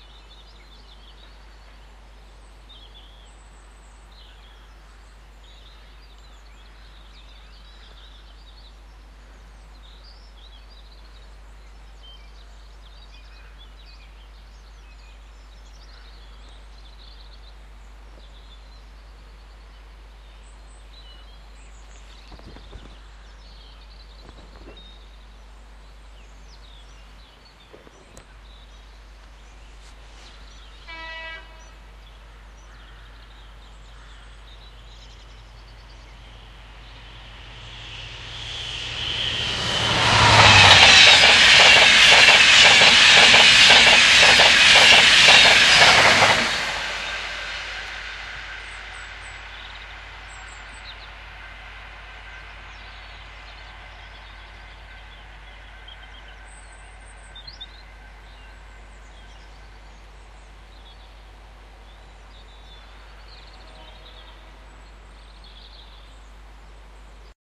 Train Pass Close 1
A recording of a close pass by an inter-city (fairly fast) train. It was recorded about 5m away from where the train passes. There are some birds singing and the low hum is from the electricity pylons that run overhead.
electric, inter-city, train, field-recording